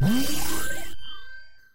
Laser Gun Recharge
This is the sound of a laser gun recharging.